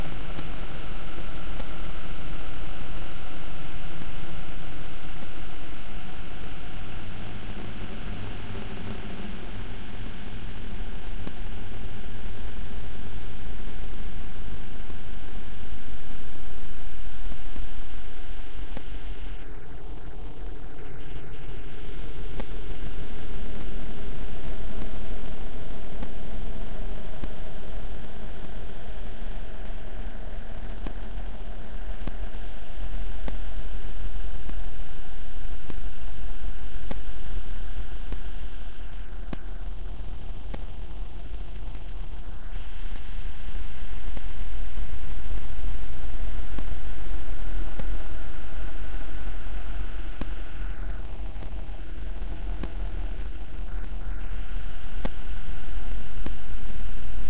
glitchy ambience. These Sounds were made by chaining a large number of plugins into a feedback loop between Brams laptop and mine. The sounds you hear
are produced entirely by the plugins inside the loop with no original sound sources involved.
alien, ambience, electronic, experimental, generative, glitch, processed, sci-fi, soundscape